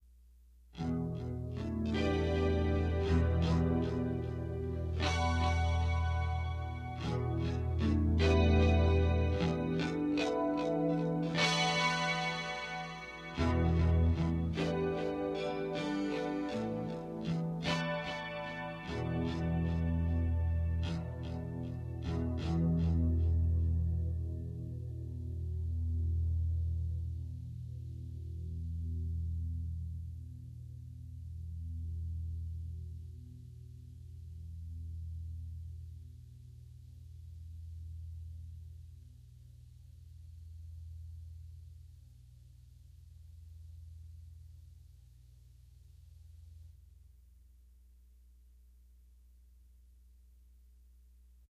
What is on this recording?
Harmonic Floating 5ths
Processed harmonics with floating 5ths. Recorded with a Fender (American made) Stratocaster with noiseless pickups, through a DigiTech GSP2101 Pro Artist tube processor.